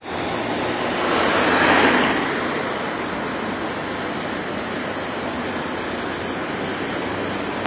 Road noise ambient
Recording of a fairly noisy road. Recorded with an app on the Samsung Galaxy S3 smartphone
busy, cars, loud, noisy, roads, vehicles